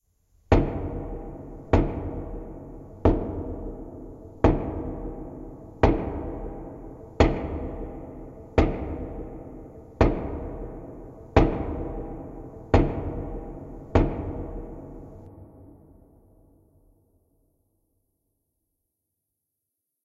epic hammering
This sound slowed way down:
to make some "biblical" hammering for a production of Jesus Christ Superstar
epic, hammer, hammering, nail, slow, wood